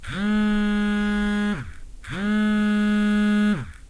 Phone vibration2
call, calling, mobile, phone, telephone, vibrate, vibrating, vibration